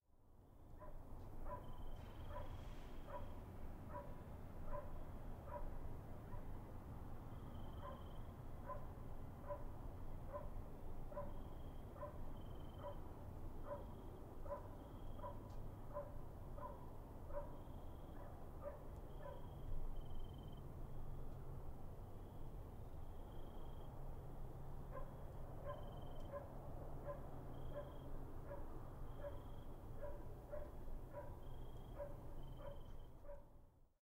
Ext-amb late-fall-night Distant-Dog-going-bizerk-fallcrickets
Late night fall with distant dog barking. Hum of crickets.
distant, exterior, night, fall, late, bark, dog, ambience, crickets